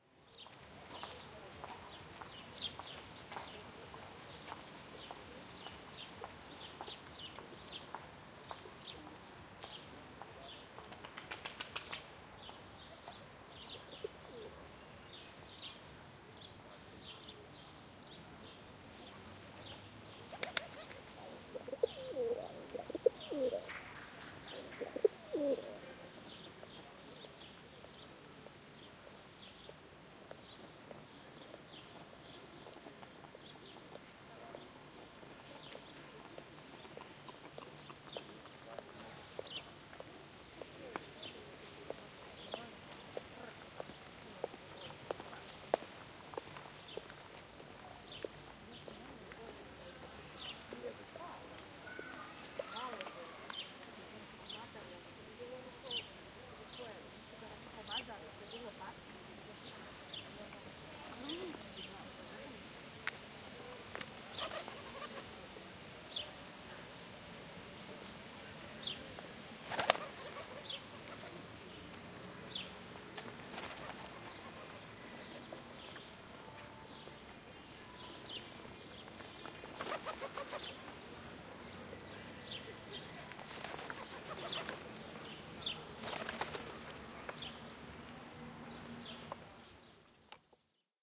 Field recordiing of a park in Belgrade, birds singing, pigeons, footsteps ambiance. Recorded with Zoom H4n 2010.